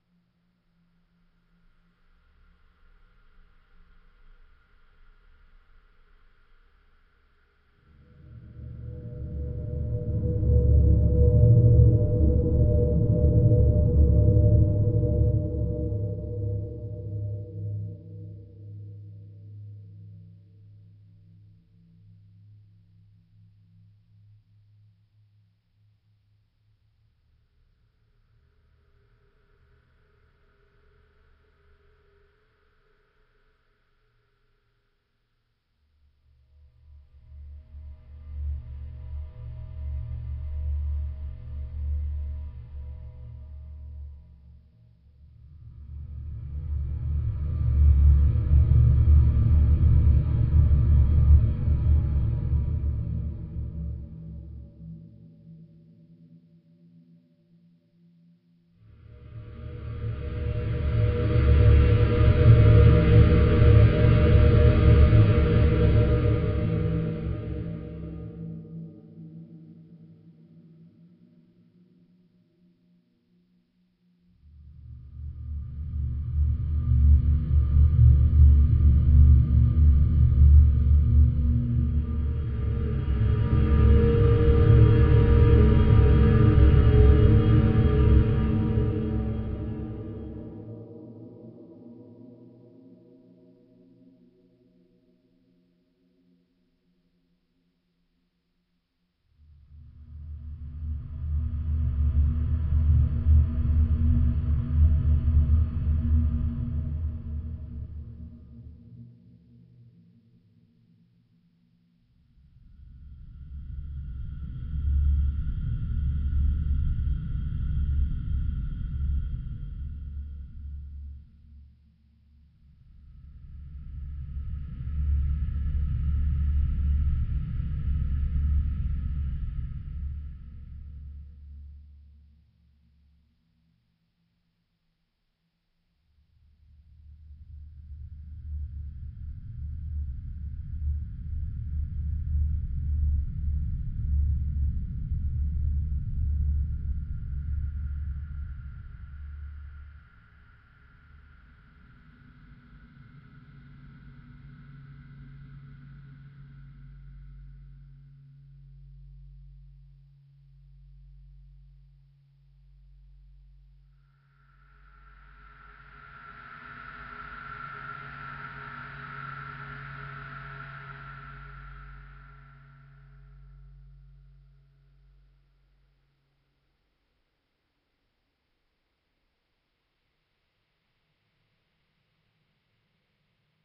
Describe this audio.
Made by processing the sound of a metal bowl filled with water. This one takes a little while to get going, so give it a chance if you are previewing it. Recording chain: Rode NT4 - Edirol R44.